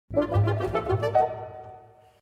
true question answer audio for apps